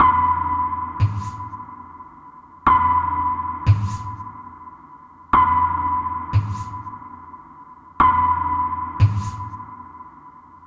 Ambient Groove 007
Produced for ambient music and world beats. Perfect for a foundation beat.
loops, groove, drum, ambient